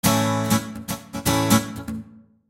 Rhythmguitar Amaj P101
Pure rhythmguitar acid-loop at 120 BPM
120-bpm, acid, guitar, loop